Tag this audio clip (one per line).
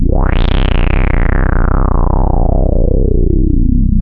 evil
multisample
horror
subtractive
synthesis